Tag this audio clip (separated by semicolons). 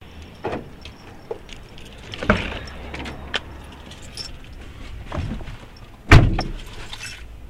car; close; closing; door; driver; driving; open; opening